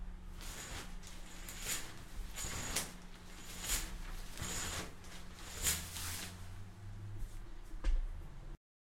cortina de baño
cerrando y abriendo cortinas de un baño
opened, opens, opening, close, open, closing, curtains